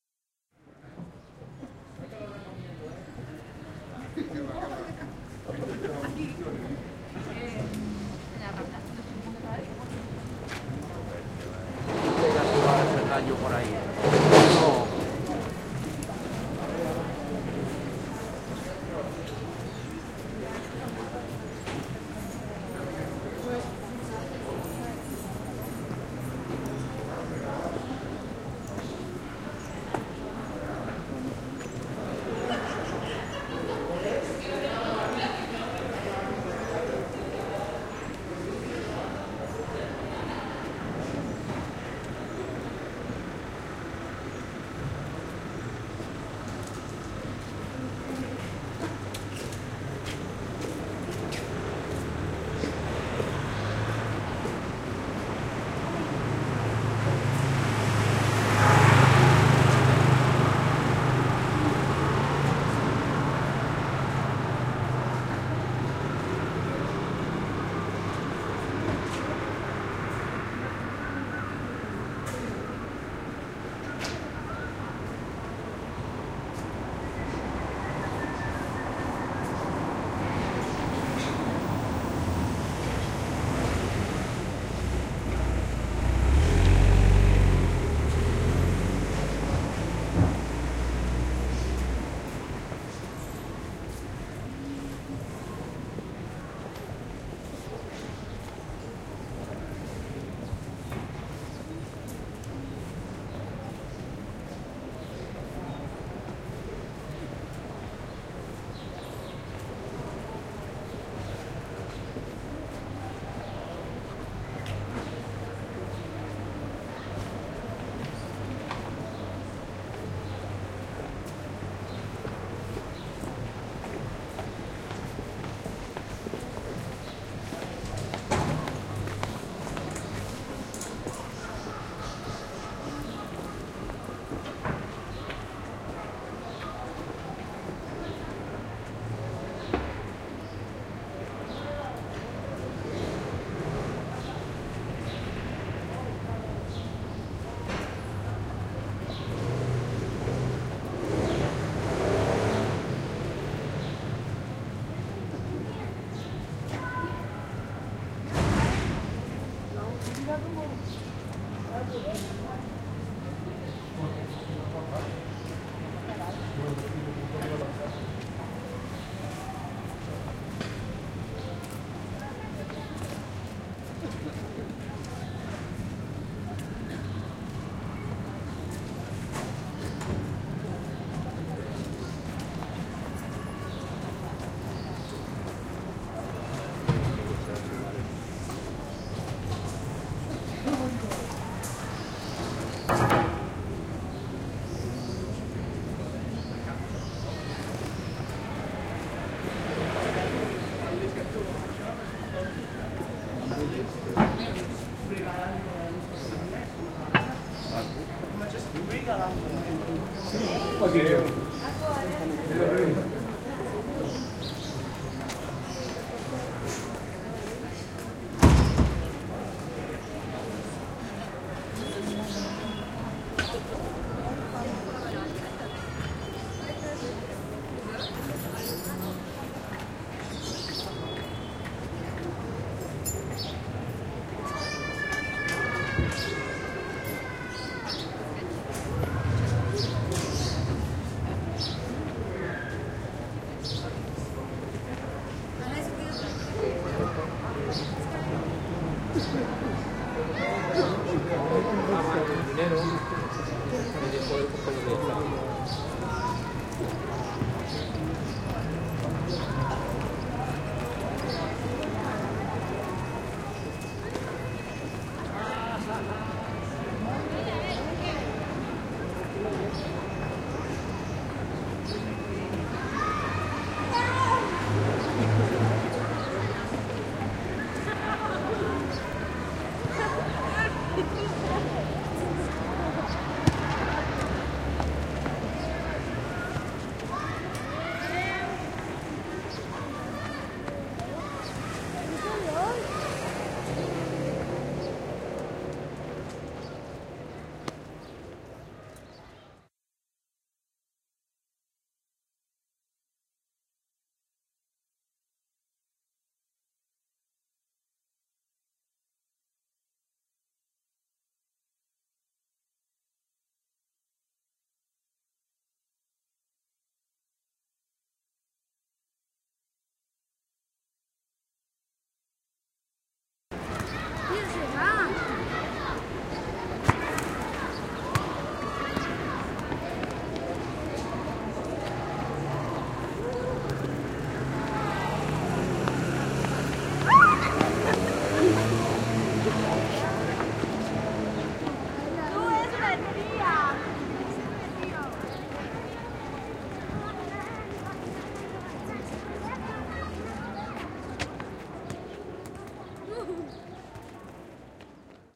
Bcnt through adrea doria
Recording made on saturday evening, through Andrea Doria Street to the market square. Marantz PDM-660 recorder, Audio Technica BP4029(AT835ST) mic.